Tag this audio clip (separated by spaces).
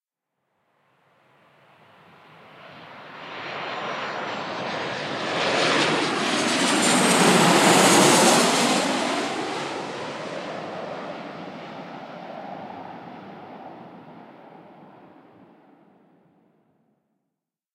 Landing Airport Birmingham Engine Flyby